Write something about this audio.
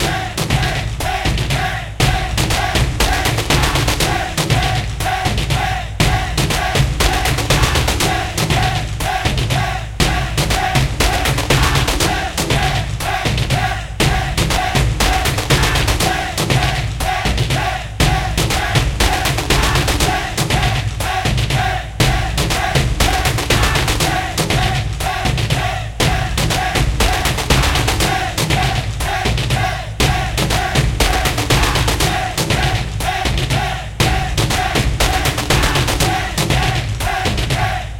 Taiko Body Vocal Beat 120BPM Loop Action Mood Cinematic
Body, Cinematic, Loop, Movie, Mood, Folk, Film, Drums, Beat, Kick, 120BPM, Vocal, Drum, Ethno, Action, Bass, Taiko